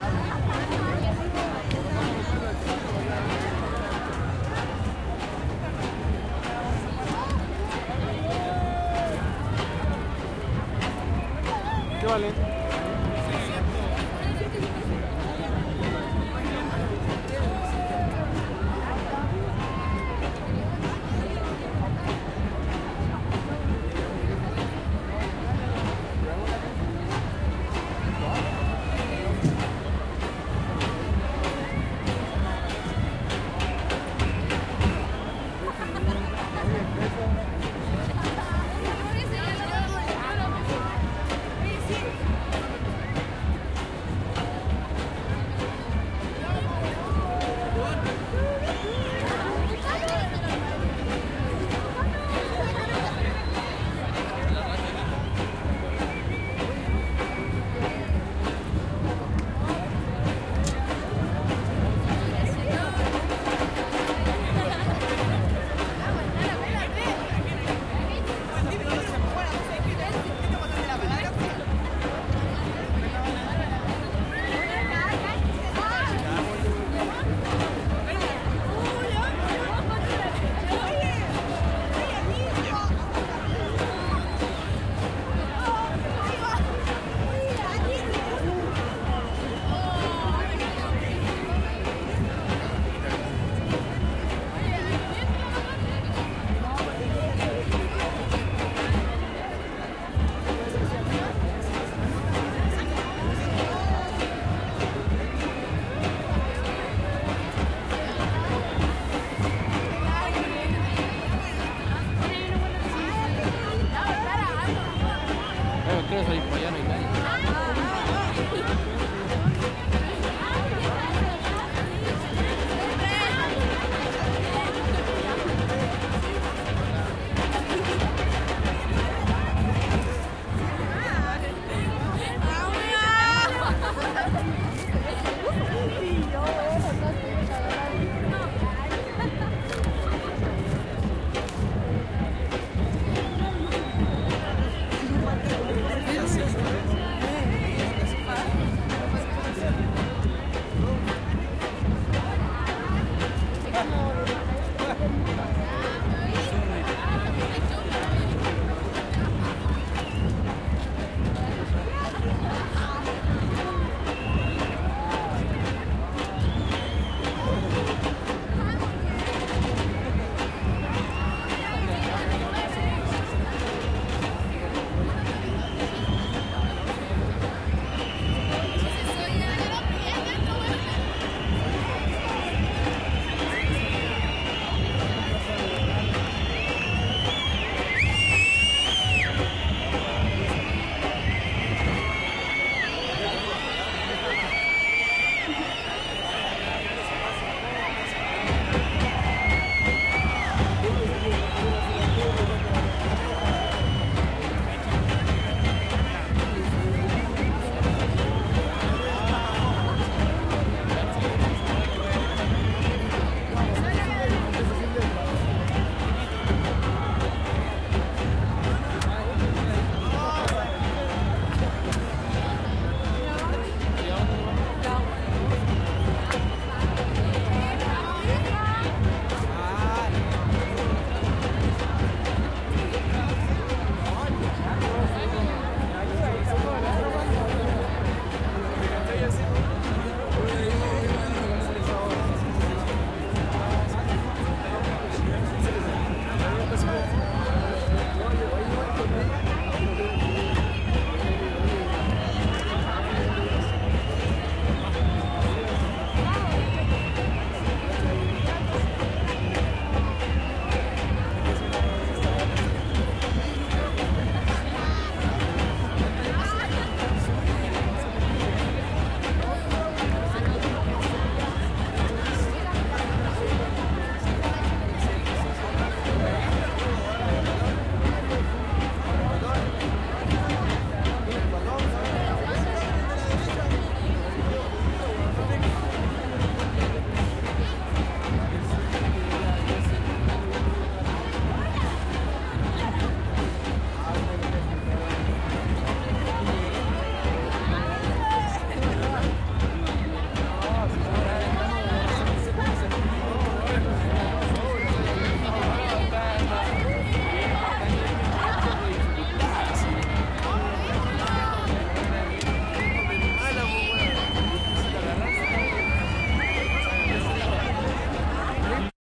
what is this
besaton por la educacion 05 - batucada constante
Besatón por la educación chilena, Plaza de Armas, Santiago de Chile, 6 de Julio 2011.
Batucadas.
armas, batucada, besaton, chile, crowd, de, educacion, estudiantes, plaza, protest, protesta, santiago